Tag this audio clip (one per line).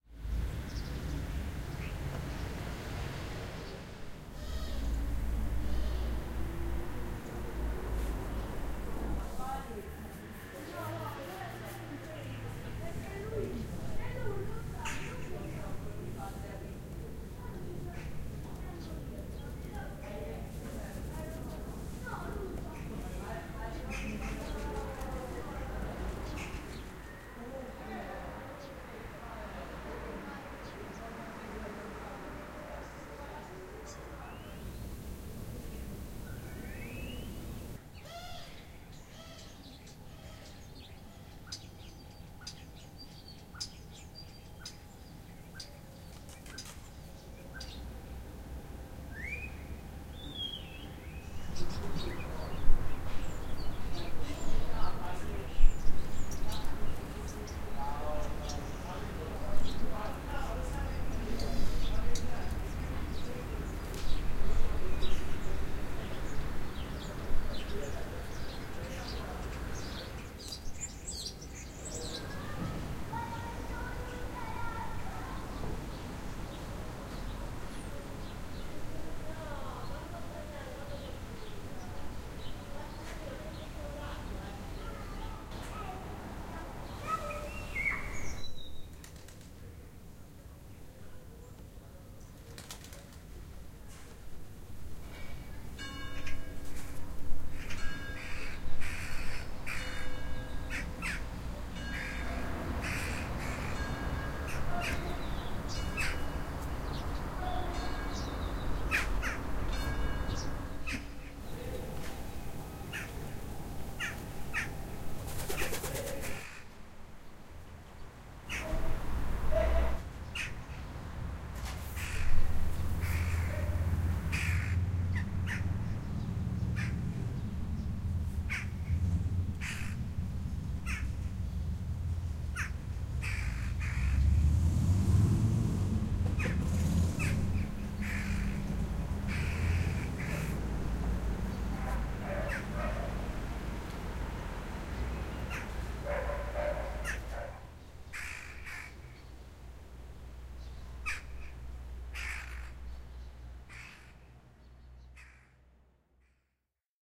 birds
city
field-recording
soundscape